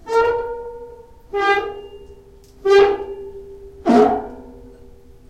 Metal Rub 3
Rubbing a wet nickel grate in my shower, recorded with a Zoom H2 using the internal mics.
resonant, nickel, rub, metal